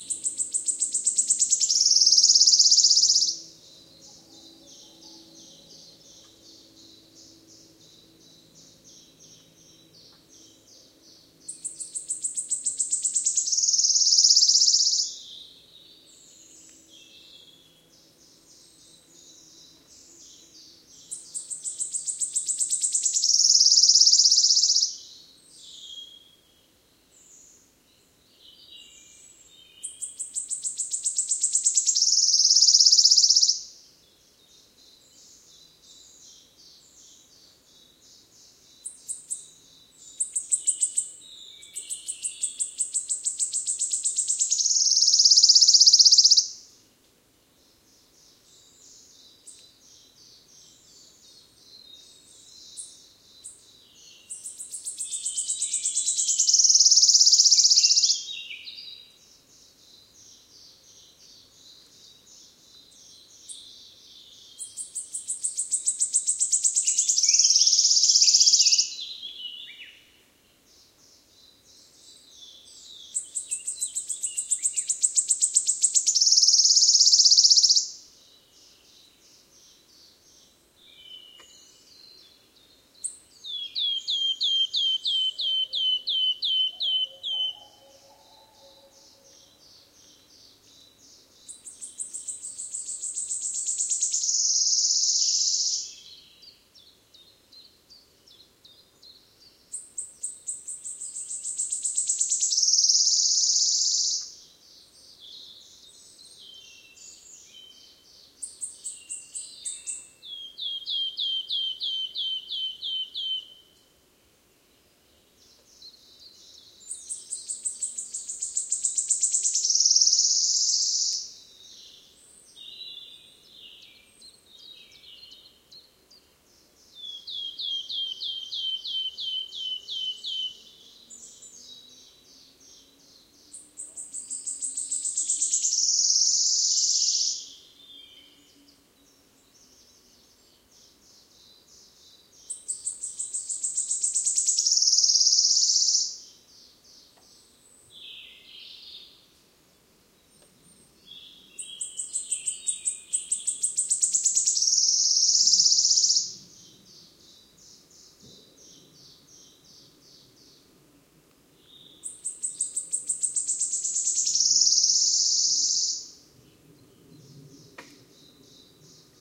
20080510 1117 Phylloscopus sibilatrix
This a recording of a Wood warbler (FR : Pouillot siffleur) (Phylloscopus sibilatrix) in a french forest (Forêt du Pinail in Vouneuil-sur-Vienne (Vienne, 86, France). Recorded with a parabolic microphone (Telinga) associated with the Olympus ls10 recorder. The file has been treated to increase the sound level and reduce the noise (very little).
phylloscopus, birdsong, forest, birdsongs, pylloscopus-sibilatrix, wood-warbler, warbler, birds